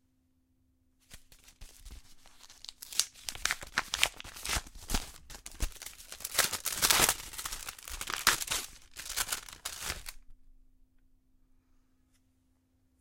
Ripping open an envelope.